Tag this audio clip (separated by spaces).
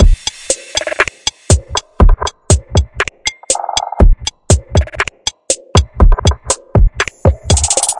beat drum drum-loop groovy improvised loop loopable percs percussion-loop quantized rhythm sticks